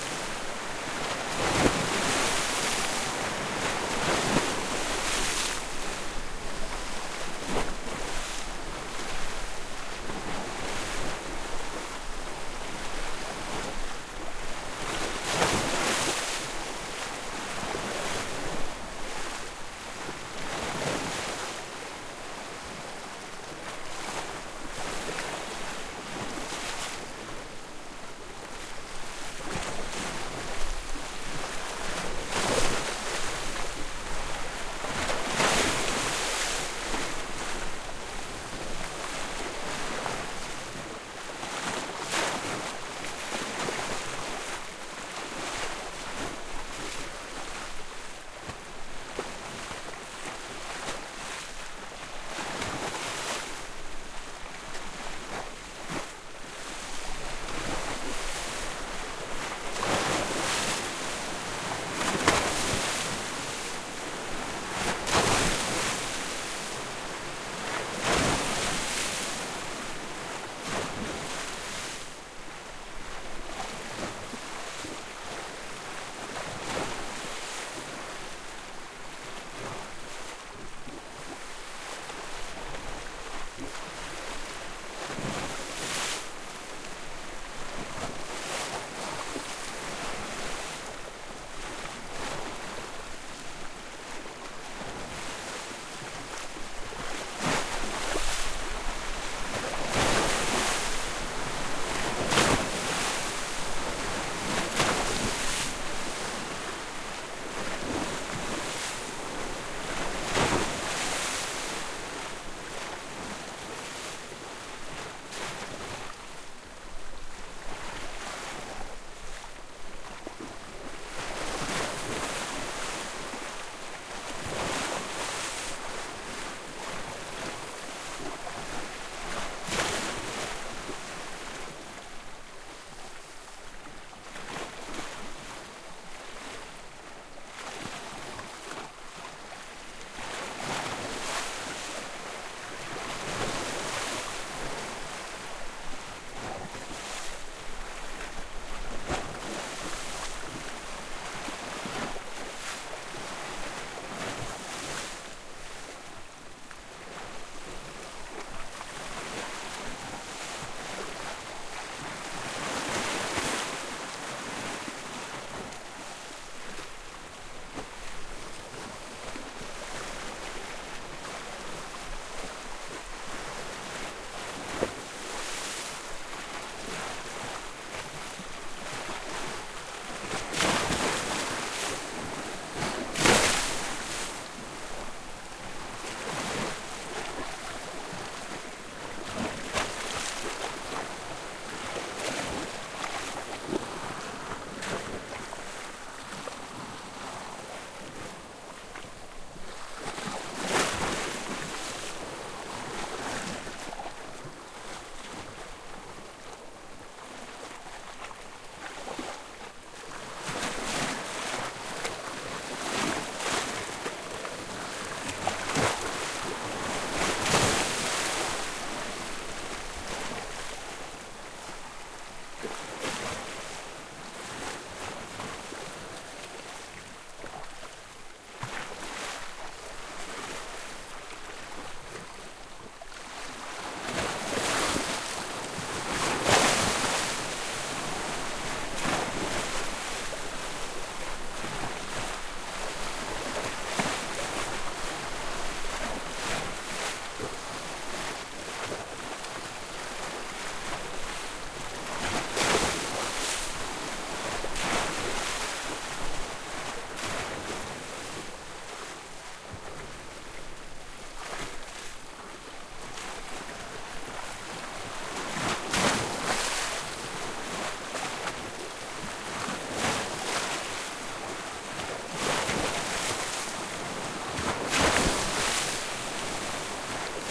Waves, mid. on Rocks, close distance
Mid waves on rocks close distance
Rocks, Greece, Sea, Waves